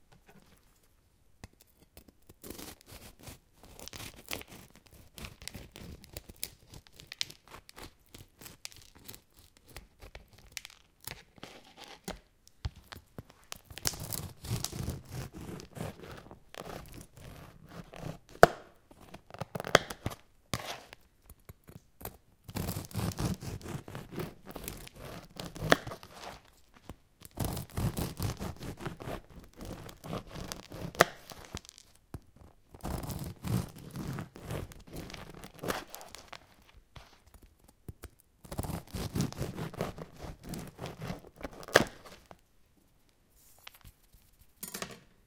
I'm cutting bread with a bread knife
bread, cut, cutting, edible, food, kitchen, knife, seed, seeds, slice, slicing, work